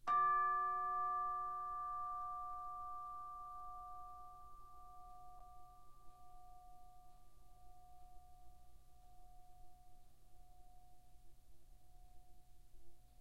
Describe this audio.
Instrument: Orchestral Chimes/Tubular Bells, Chromatic- C3-F4
Note: D, Octave 1
Volume: Piano (p)
RR Var: 1
Mic Setup: 6 SM-57's: 4 in Decca Tree (side-stereo pair-side), 2 close
bells, chimes, decca-tree, music, orchestra, sample